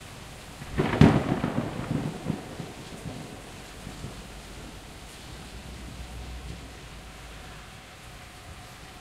Thunder. Rain in the city.
Recorded: 15-07-2013
XY-stereo, Tascam DR-40, deadcat
See also: